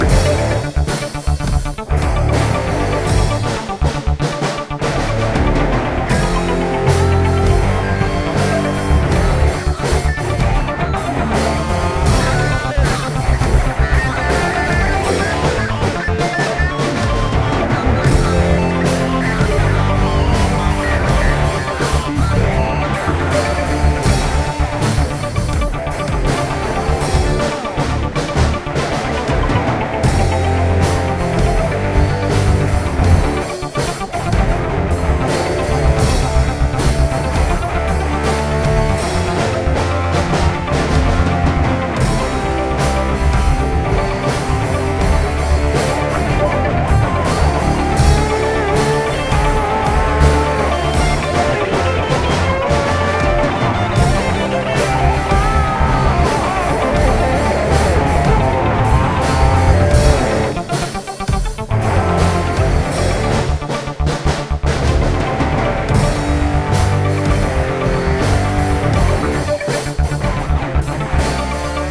Aw Snap Synchronicity
Trippindicular !!!
Sounds: "Jammin with Snapper" and "Super Arp Key of D 118"
Drum Track Provided by: Snapper4298
Audio, Beats, Blues, Clips, Dub, Dubstep, Electro, Guitar, House, Jam, Keyboards, Music, Original, Rock, Synchronicity, Synth, Techno, Traxis